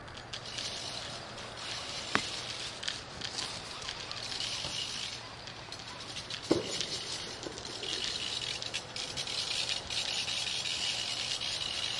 Total chaos ensues during new year celebrations recorded with DS-40.
pandemonium, event, chaos, party, holiday, celebration, new-years